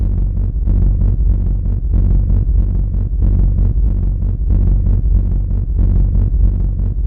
Mechanical drone, pulsing, rumble.
drone, mechanical, pulse, rumble